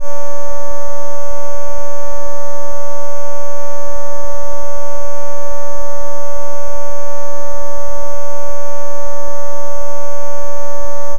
Laser sustained
A simple filtered sawtooth wave that sounds like a laser beam! Check out my updated and better version of this sound here
laser, noise, synthesized